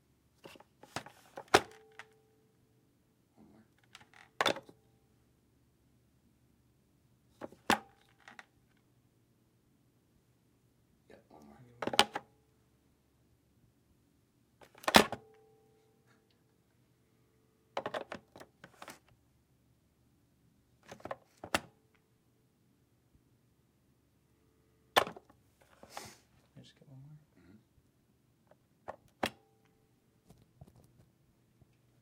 Cordless electronic telephone handling in cradle